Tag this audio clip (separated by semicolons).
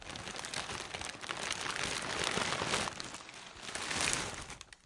cloth; crumple; paper; plastic